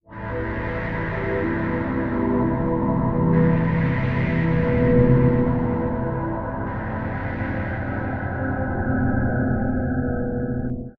Layered pads for your sampler.Ambient, lounge, downbeat, electronica, chillout.Tempo aprox :90 bpm
ambient, chillout, downbeat, electronica, layered, lounge, pad, sampler, synth, texture